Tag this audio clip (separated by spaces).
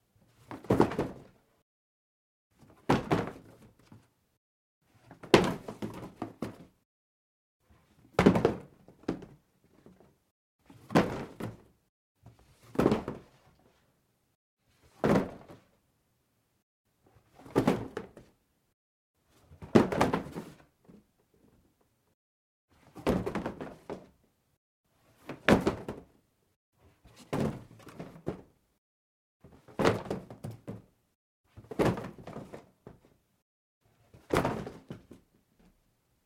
cardboard debris drop